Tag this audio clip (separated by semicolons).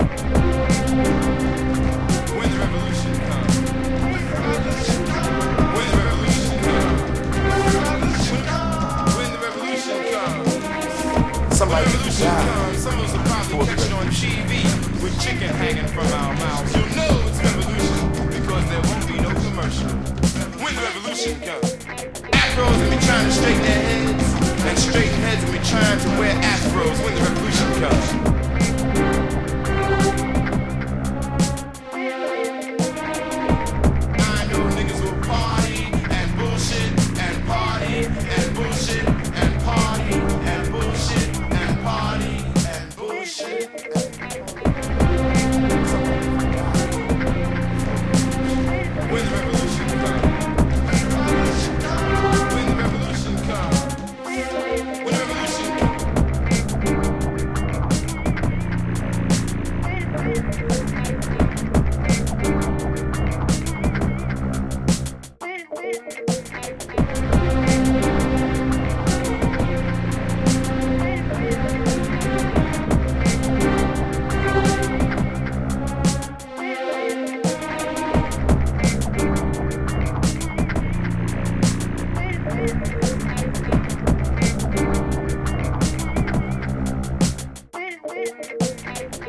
beats; hiphop; looppacks